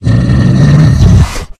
A powerful low pitched voice sound effect useful for large creatures, such as orcs, to make your game a more immersive experience. The sound is great for attacking, idling, dying, screaming brutes, who are standing in your way of justice.
low-pitch, Orc, brute, vocal, voice, sfx, videogames, troll, games, arcade, Speak, monster, Talk, fantasy, gaming, indiegamedev, gamedev, indiedev, gamedeveloping, RPG, game, videogame, Voices, deep, male